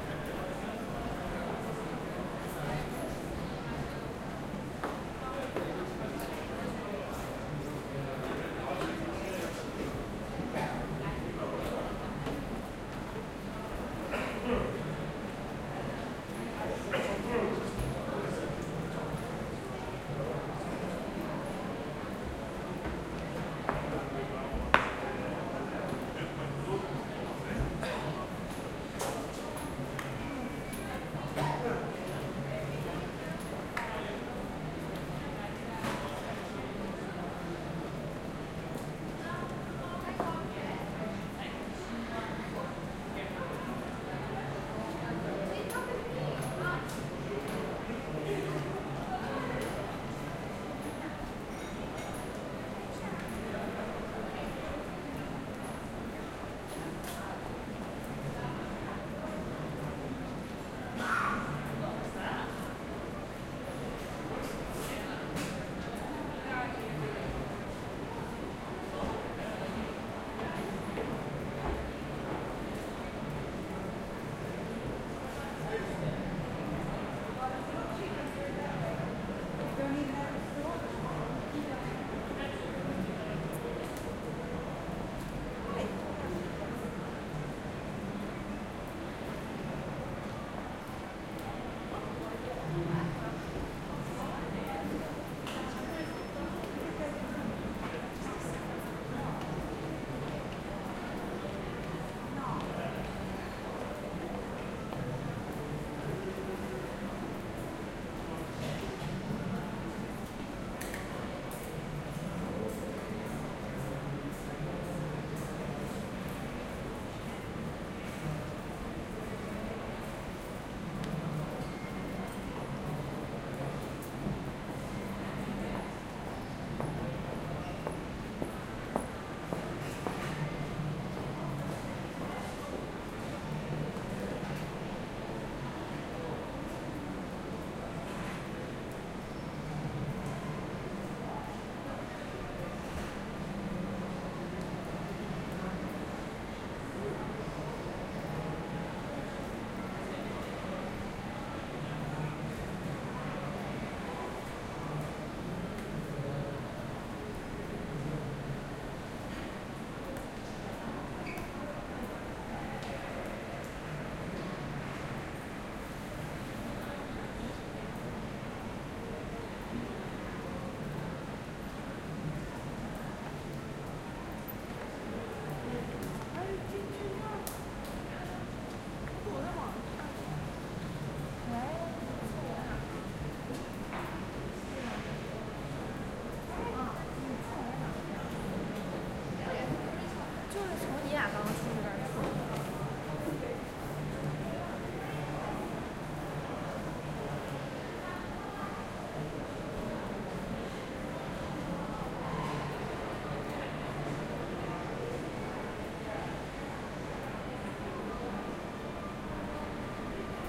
Was in central London with a half an hour to spare. It was a rainy day in September. Each clip is a few minutes long with sounds of people chatting and walking by. Some clips have distant music or cars driving by. Some clips suffer a bit of wind noise.